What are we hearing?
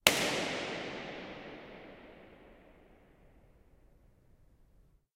Impulse Response Church
Impulse response recorded from a bursting balloon in the St. Carolus Church in Vienna/Austria (Karlskirche). Measured reverberation time (RT60) is 5.0 seconds average. Recorded with a measurement microphone K4 by Arnold Esper on the ground level of the church, 8 meters distance from the source. For measurement and analysis purpose, can be used as convoltion reverb also.